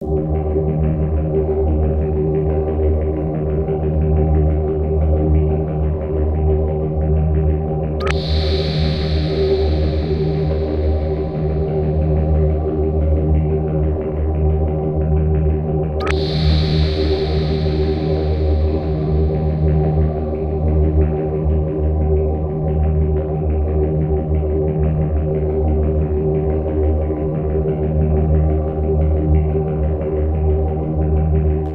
A sound that represents the night. I have called it awereness because this sound also gives a feeling of paying attention beiing up the whole night.First i made a midi sample with Ableton, and edited with a plug-in